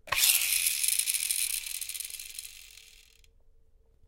Little spring toy car 1
I took my little boy's toy car to the studio. So useful.
toy, wheel, car, spring, plastic